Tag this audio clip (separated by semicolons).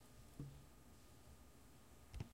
ambient; static; noise